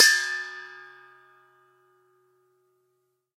bigbowljuicerstrike04.HP
large metal bowl struck with large metal juicer, highpass filtered
bowl, metal, strike